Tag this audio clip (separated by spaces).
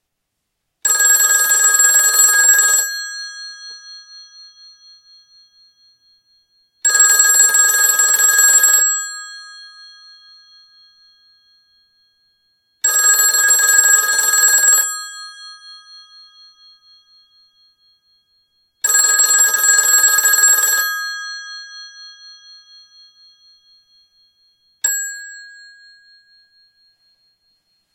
Antique Bell Phone Ringing Rotary-phone Telephone